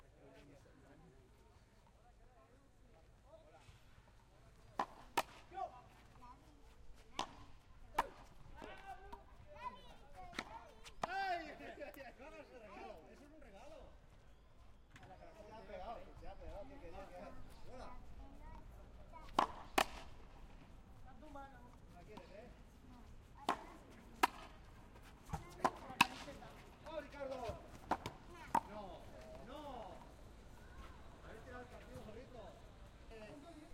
Another part of the match of Frontenis.
barcelona, racket, ball, frontenis, clot, sport, park